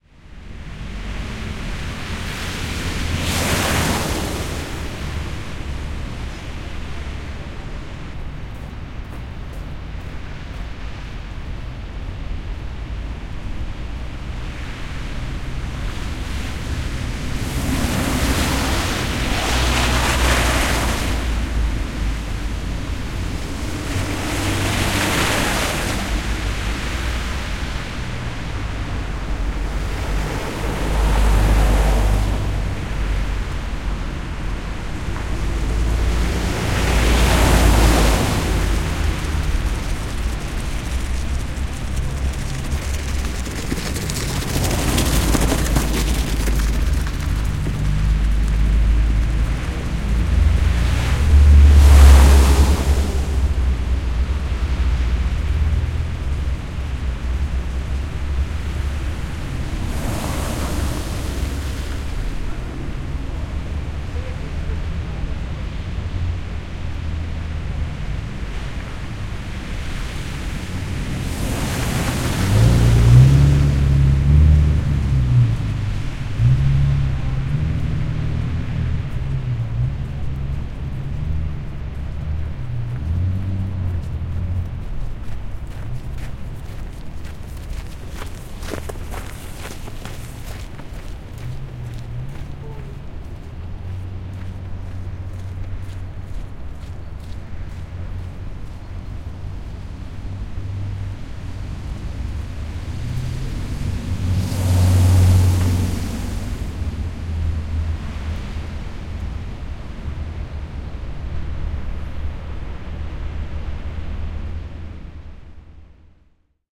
Autoja ohi märällä, loskaisella kadulla, nastarenkaita. Joskus jalankulkijoiden askeleita, 43" vetolaukku ohi. Taustalla liikenteen kuminaa kauempaa.
Paikka/Place: Suomi / Finland / Helsinki, PIkku-Huopalahti
Aika/Date: 10.02.2004